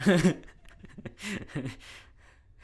Real laughter
laugh, laughter, real